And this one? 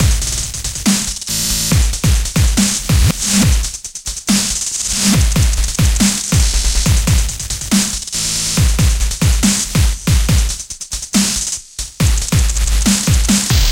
Glitch Crushed Drums 140bpm
another quick glitch drumloop I made.
140, bit, bpm, crushed, fuzz, glitch, kick, noise, snare, tone